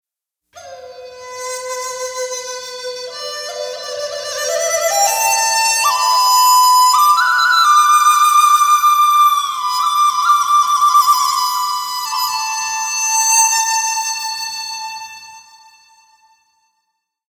DiZi Chinese Flute Sample 2
flute, chinese, relaxation, dizi, asian, instrument, new, relaxing, age